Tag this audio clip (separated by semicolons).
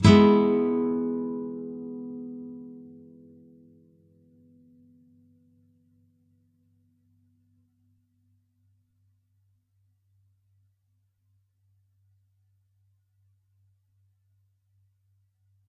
guitar; open-chords; chords; 7th; clean; nylon-guitar; acoustic